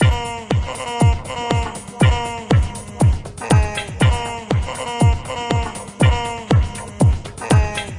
Processed acid-loop 120 bpm with drums and human voice